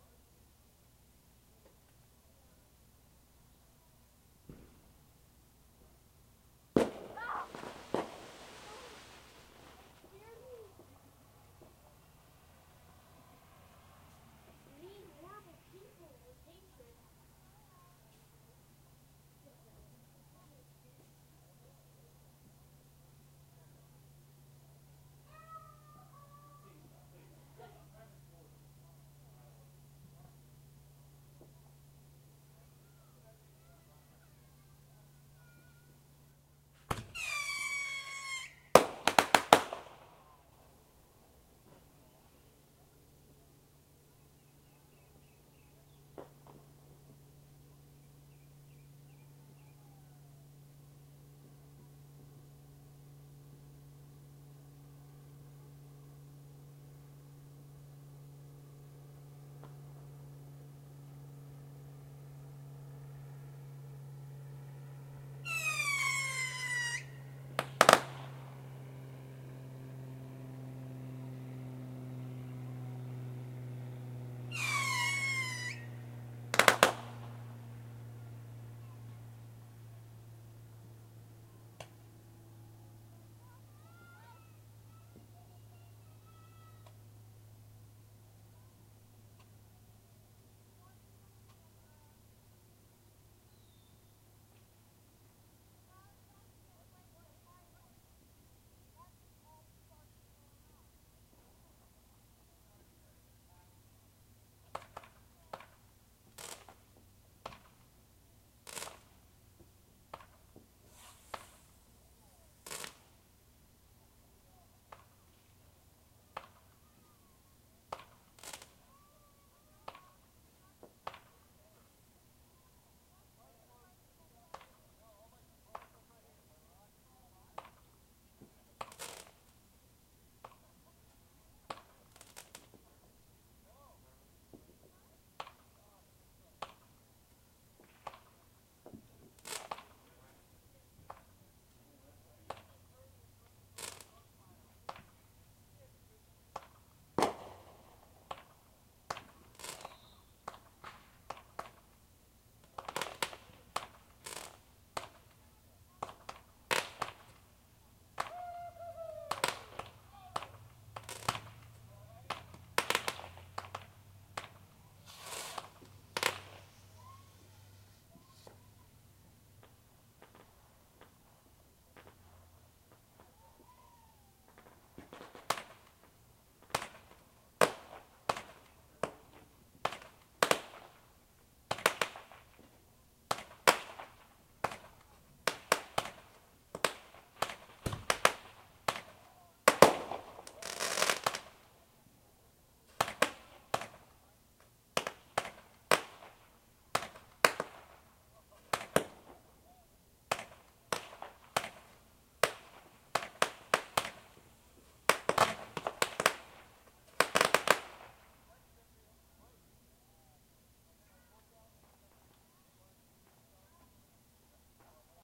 Fireworks recorded with laptop and USB microphone as an airplane flys over. Lots of people take their planes up to watch the fireworks.